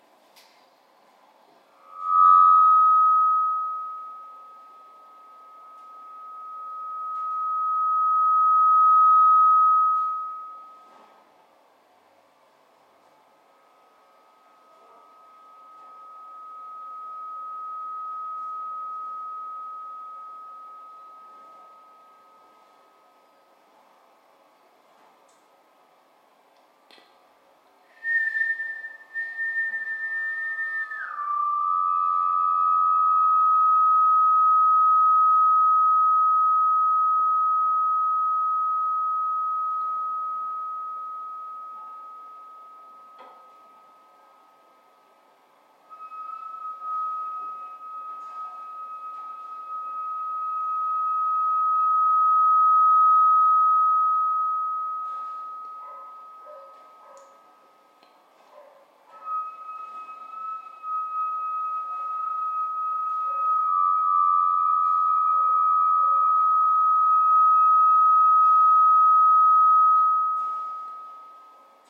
a shotgun mike and speakers + amp